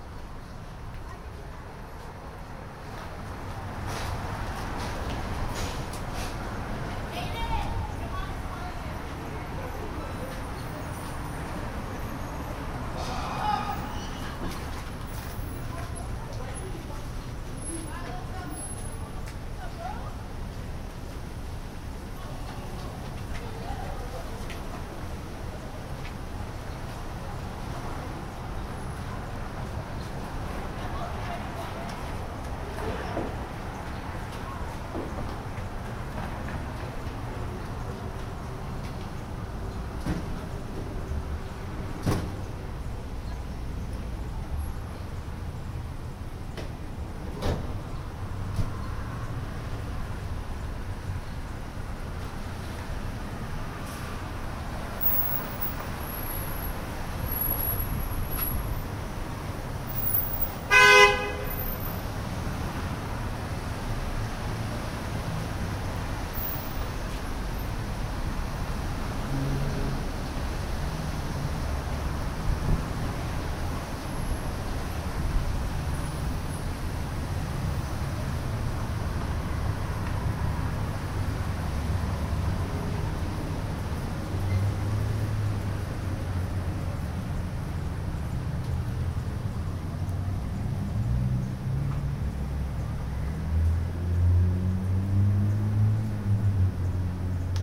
street ambiance brooklyn
Recorded from my Brooklyn balcony. General distant street noise, some voices, wind, car doors. One loud car honk.